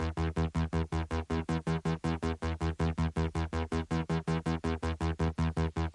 Bass Arp
Bass sequence a la ARP2006, created using Live 9.5
Bass, Rhythm, Synthesiser